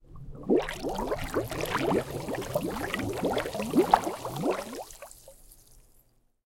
Bubbling, Large, A
Raw audio of large bubbles created by blowing air out of my mouth while underwater in a swimming pool. The recorder was about 15cm away from the bubbles.
An example of how you might credit is by putting this in the description/credits:
The sound was recorded using a "H1 Zoom recorder" on 1st August 2017.